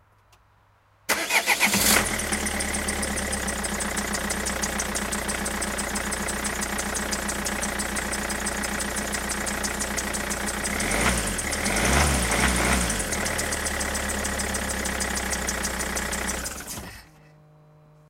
The diesel engine of a Citroën Jumpy 2010 2.0 HDI starting, idling, revving and stopping. Recorded with a Zoom H1n in the Swedish winter with a temperature below zero.
Podcaster working with Swedish motorsports podcast Driftpodden